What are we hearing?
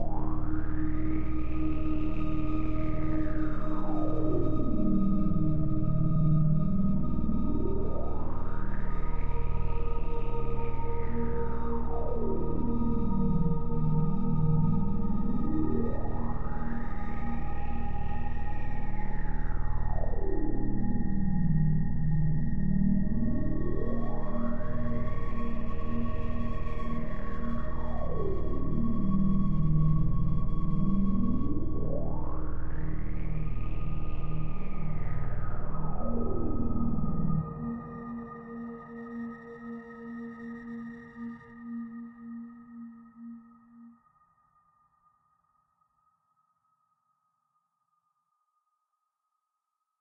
a kind of pad or drone, texture... I don't know.... close your eyes and travel through space!
4 Synthesizers used... subtractive synthesis, fm synthesis, some effects....